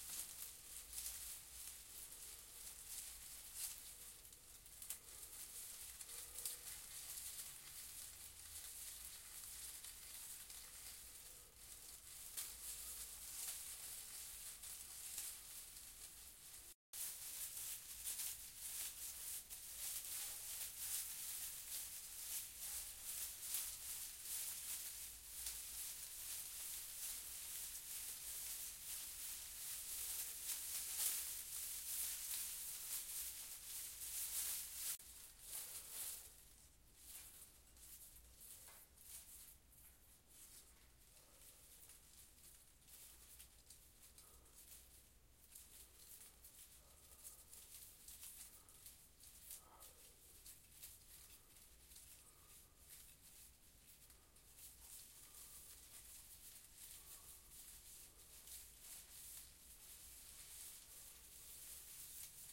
Simulation of leaves rustling. I needed a sound that didn't also include the wind/breeze because I prefer to layer different elements. When I found a dead plant in the basement of my apartment building, I took the opportunity to record some light rustling sounds.
Recorded on May 04, 2021.
Edited on May 09, 2021 to merge the best of the raw recording - there are gaps between each segment.
Zoom h4n Pro with the on-board X-Y mic.
bush, foliage, grass, leaf, leaves, rustle, rustling, tree, trees